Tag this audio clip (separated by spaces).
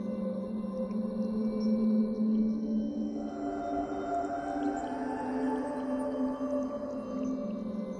hiss loop melody noisy 120bpm